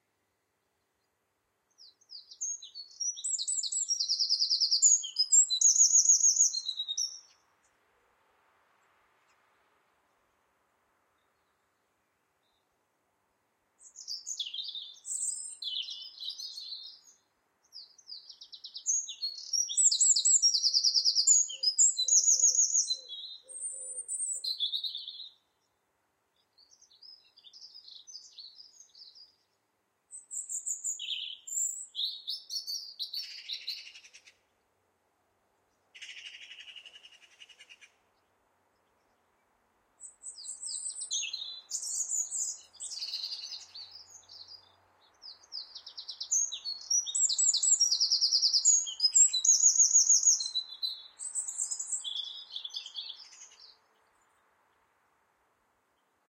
Dawn Lockdown 01
Dawn chorus from the back yard. Usually noisy, but the roads were quiet due to the lockdown May 2020. Manchester, UK.
ambience, field-recording, birds, nature, Dawn